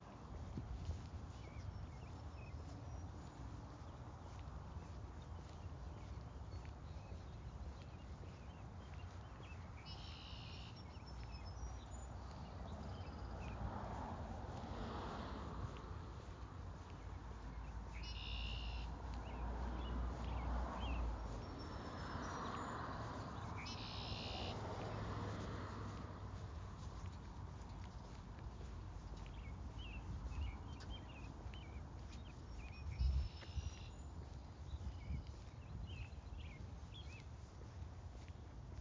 Ambient noise of me walking to work